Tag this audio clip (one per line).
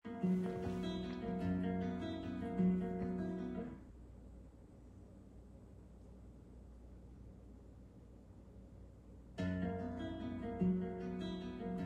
instruments
music
sounds